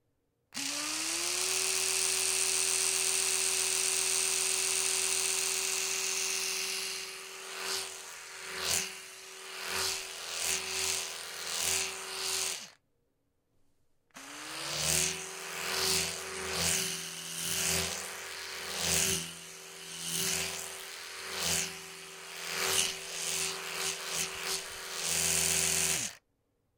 Electric Toothbrush Flyby
Flybys of an electric toothbrush.
electric, flyby, fly-by